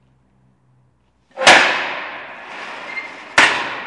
Cell door 5
Sounds recorded from a prision.